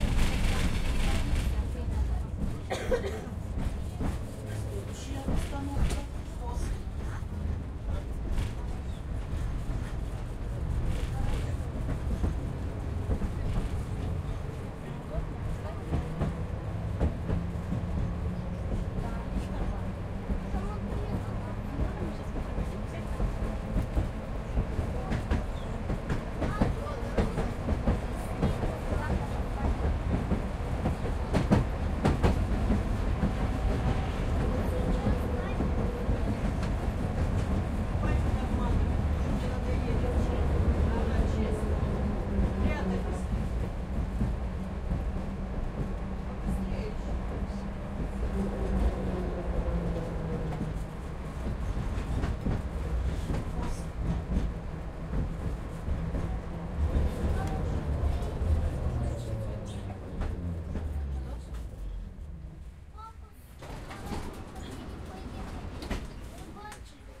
Tram rides over the bridge1
Tram rides over the bridge.
Recorded: 2012-11-05.
bridge, city, noise, town, tram